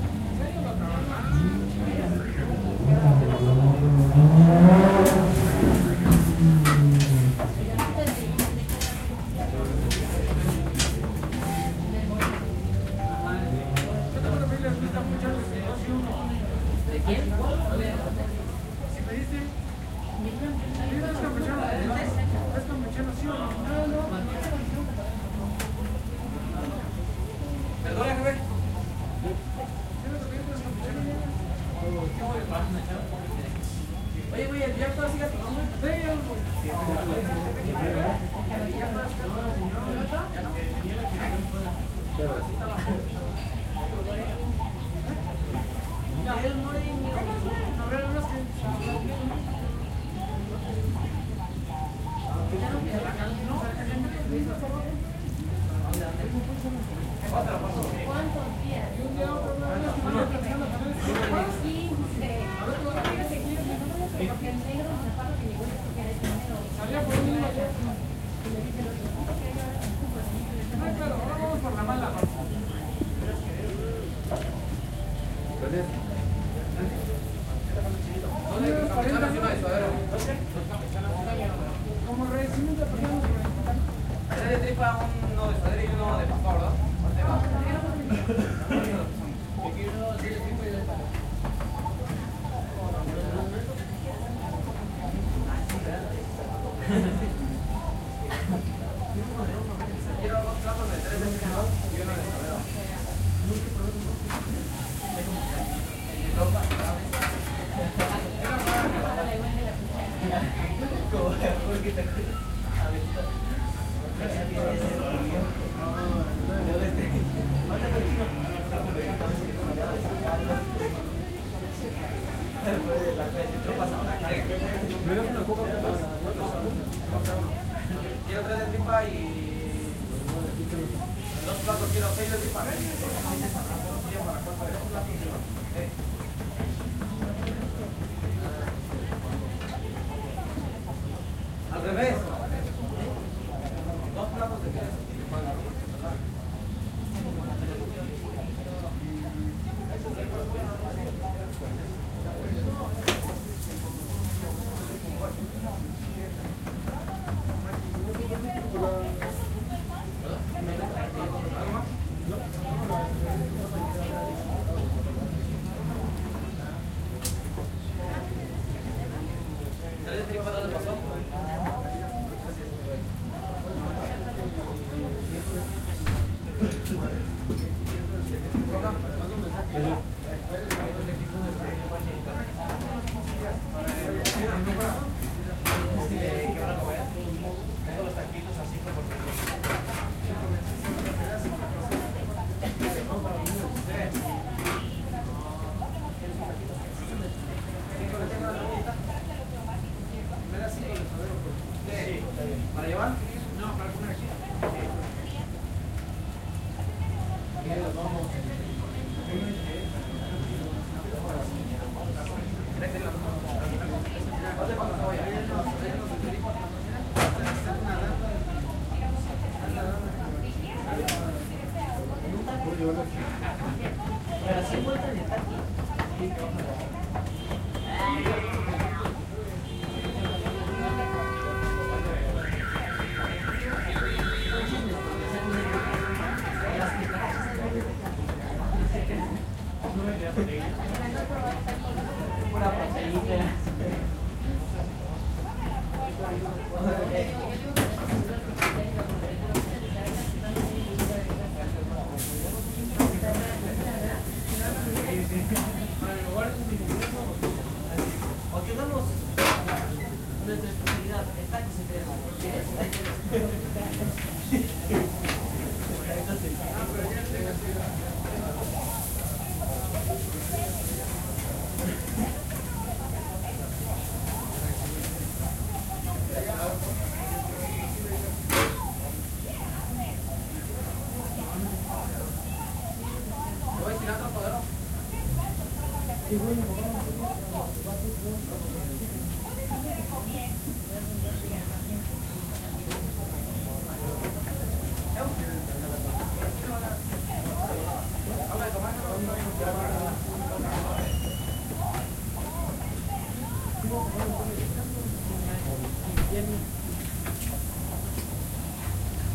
A little place where sell mexican food
Ambience, Food, Restaurant, Tacos